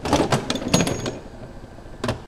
mono field recording made using a homemade mic
in a machine shop, sounds like filename--wrenches
field-recording, metallic, percussion